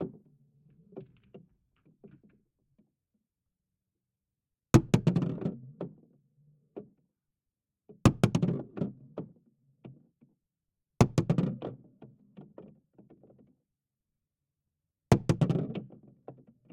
Inside Pool Table
This is of someone sinking a ball during a game of pool/billiards/snooker and what the ball sounds like from the inside of the table.